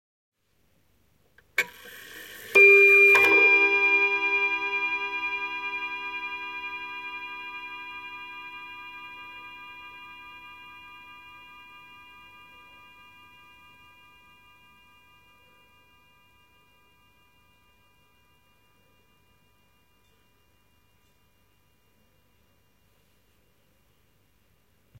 Half o'clock ;-)
Antique table clock (probably early 20th century) chiming a single time.
antique, chimes, clock, half, hour, o, pendulum, time